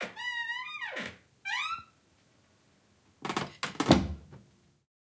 Closing squeaky door
closing a squeaky door
close, closing, clunk, creak, creaky, door, open, opening, shut, squeak, squeaky, wood, wooden